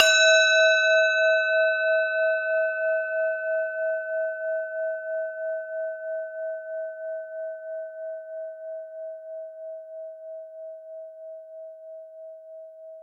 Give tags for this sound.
percussion
bronze
mono
xy
bell
ding
ring
chime

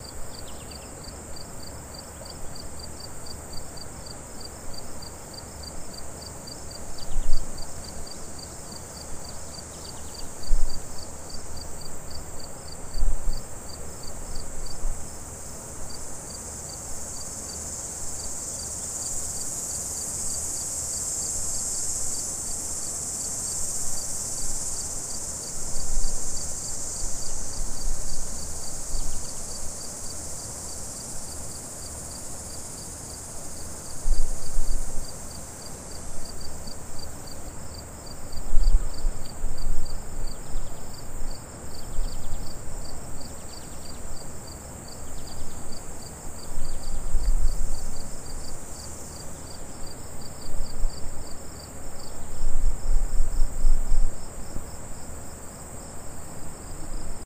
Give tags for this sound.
ambient
chirping
chirps
crickets
hiss
loop
nature

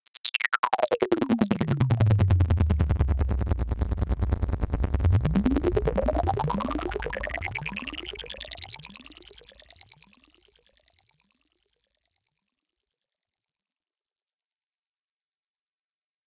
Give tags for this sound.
fx
sfx
electronic
acid
synth
sweep

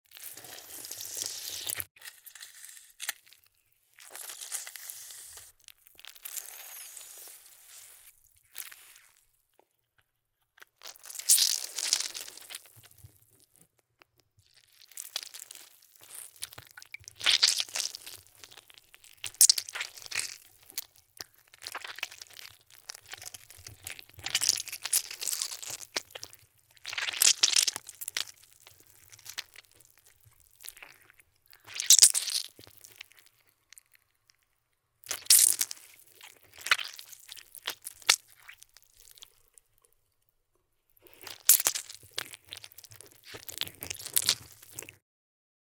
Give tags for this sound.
fruit
juice

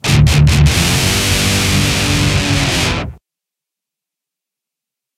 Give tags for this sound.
2-IN-THE-CHEST
REVEREND-BJ-MCBRIDE

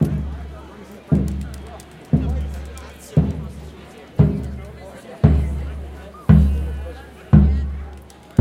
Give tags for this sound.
crowd
drum
drums
field-recording
march
marching
marching-band
parade
people

